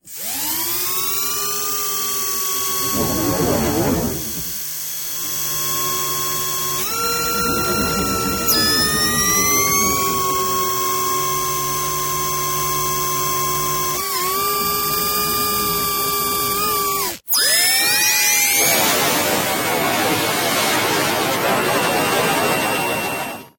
HyperSpeed Charger
My hyper-space engine took us 1st through the checkered, for the Win!!!
hyper-charger, hyper, fast, hyperspeed, turbo-charger, jet, speed, propulsion, win, engine, hyperspace, super-charger